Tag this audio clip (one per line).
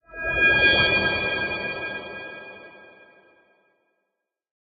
videogames
science-fiction
high-tech
celebrate
gamedeveloping
sci-fi
video-game
games
win
indiedev
futuristic
game
jingle
indiegamedev
achivement
gamedev
complete
gaming
sfx